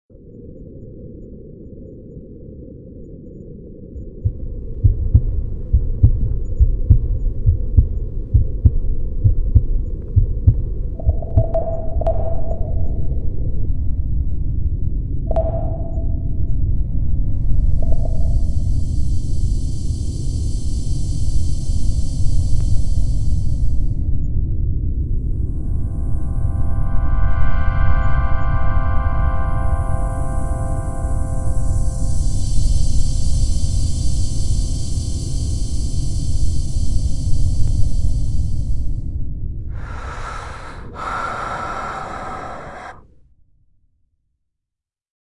SoundTrek - SGTG (mid term sample)
Soundscape of the space